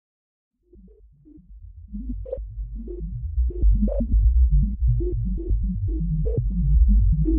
Space Bubbles 2
Heavily processed VST synth sounds using various filters, delays, flangers, chorus and reverb.
Alien, Bubbles, Outer-Space, SciFi, Space, Spaceship, VST, Whip